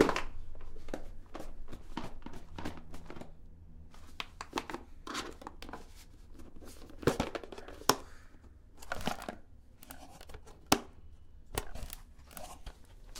Plastic Box
box, plastic, things